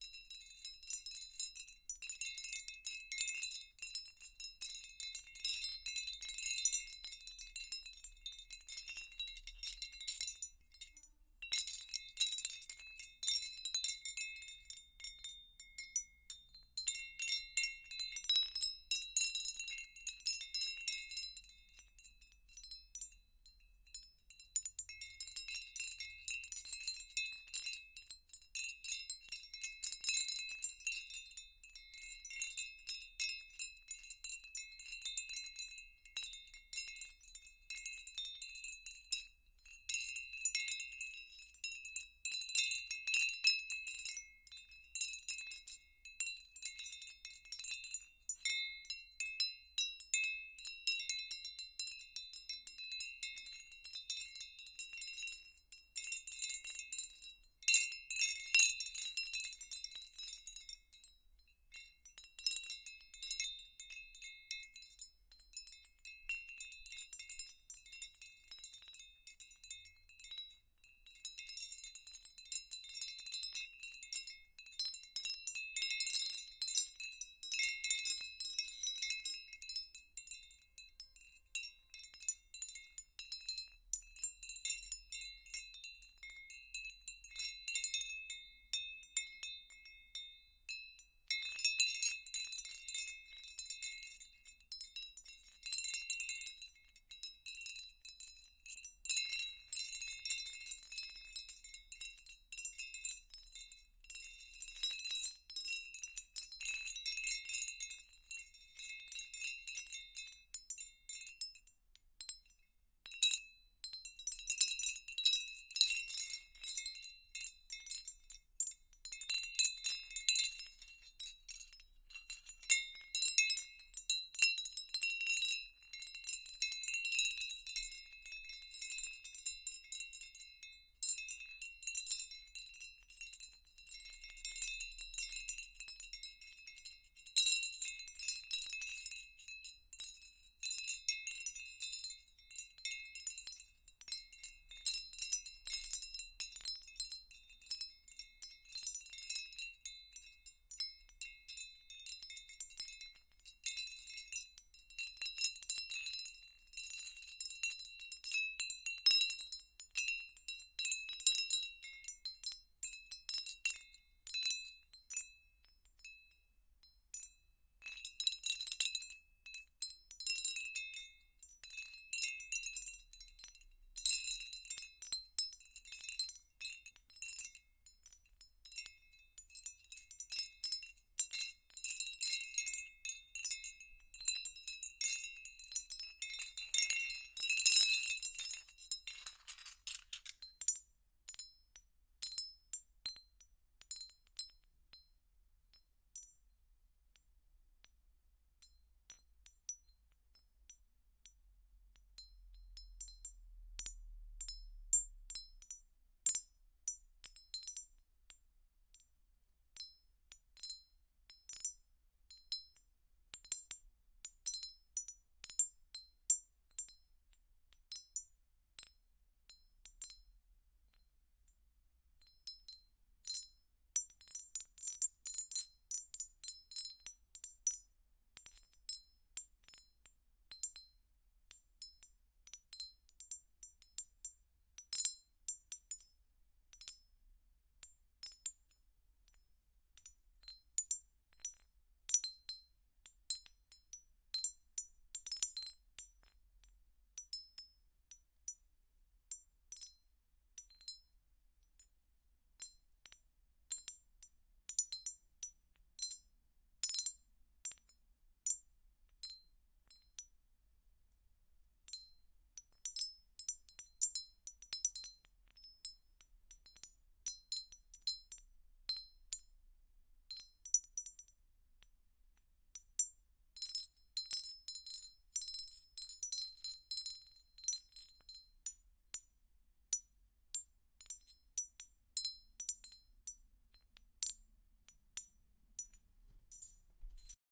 various Glassy Stone Windchime sounds
Recording of a wind-chime made from thin slices of glassy stone; not a geologist, so I'm not sure what kind of stone exactly, it's the colorful kind you can see thru when it's sliced very thin.
chime, windchime, stone, wind-chime, clink